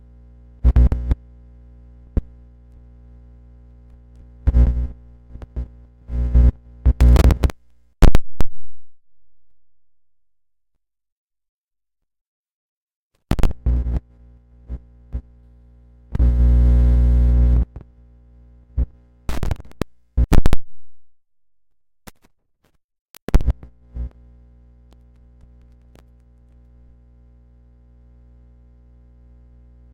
random cable noise
Random noise from fiddling around with a cable I was recording with
Recorded like this, it was kinda ridiculous but very fun:
iPhone -> 1/8" to RCA cable -> used one of the RCA outputs -> RCA to 1/4" adapter -> Scarlett 2i2 -> ProTools
cable, electric, electrical, noise, random